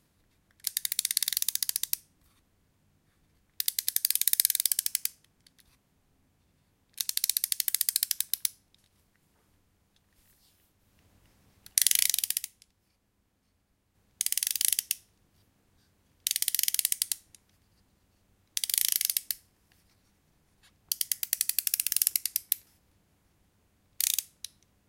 Sound of winding up a cheap wind-up toy
toy, children